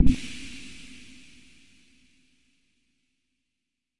batterie 1 - hard weirdness 2
BATTERIE 01 PACK is a series of mainly soft drum sounds distilled from a home recording with my zoom H4 recorder. The description of the sounds is in the name. Created with Native Instruments Battery 3 within Cubase 5.
percussion, short